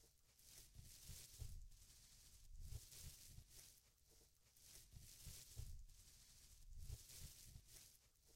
stepping on leaves
sound of leaves and branches breaking by trash noises.
leaves, woods